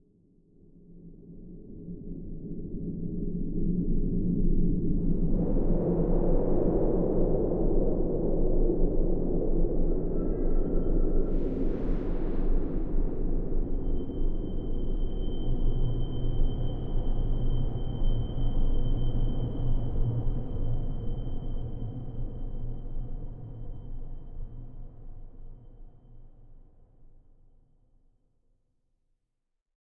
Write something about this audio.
Dark Emptiness 025
ambient, atmo, atmosphere, cinematic, creepy, dark, deep, drama, dramatic, drone, effects, experimental, film, flims, game, oscuro, pad, sinister, sound, soundscapes, suspense, tenebroso, terrifying, terror